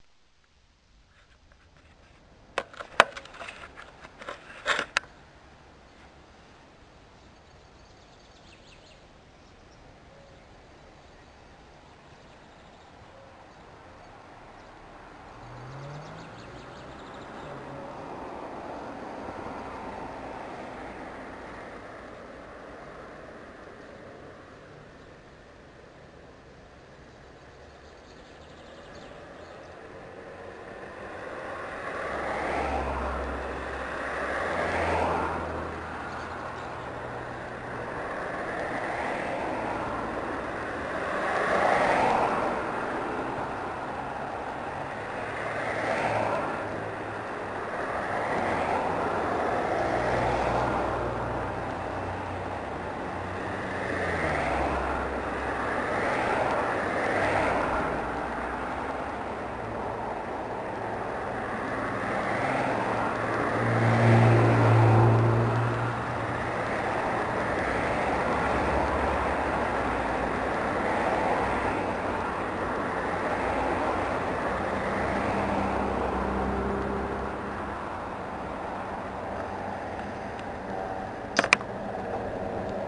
Whilst sat waiting for my lift to work, I just recorded what was happening around me on my mp3 player. It's called Chesterfield Road 1840 because my lift was from there, and it was 1840 hours.
ambience, birds, noise, street, traffic, wildlife